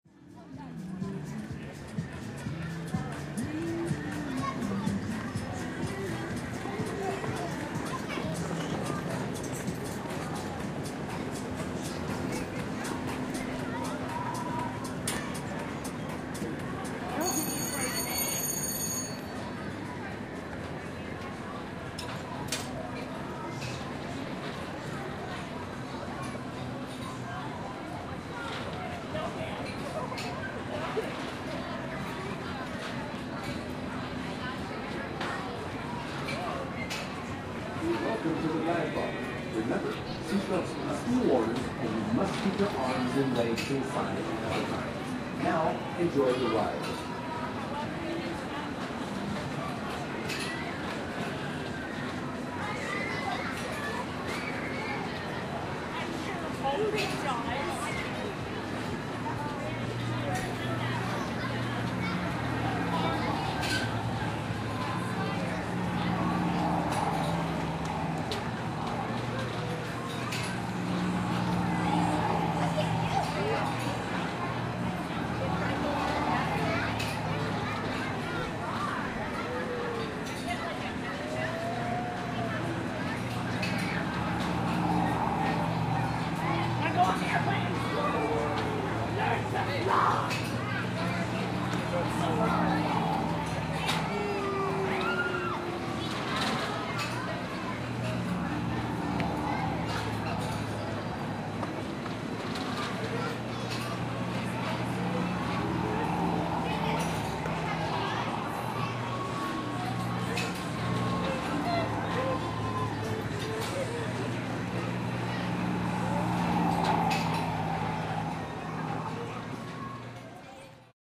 Dive Bomber Kids Carnival Ride
This is a carnival Merry Go Round giving rides without any music... so we hear the clanks and bangs of the metal ride going around and the chatter of nearby people, plus a bell, and the recorded sound effects of a kids' ride call the "Dive Bomber" right next door.
amusement, rides, carnival, voices, games, barker, people, field-recording, fair, midway, background, ambience, fun, kids